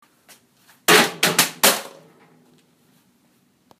Laptop Drop 5
Laptop breaking when dropped.